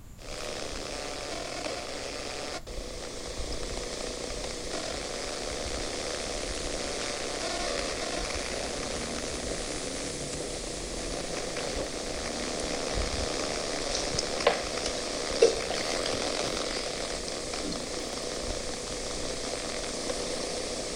fizzy water
First I used an iPad to make a video recording (with sound) of a glass of sparkling water. Then I played that back on the iPad and recorded it with a Zoom H2. Cleaned it all up in Audacity.
carbonation, effervescence, fizzy, liquid, water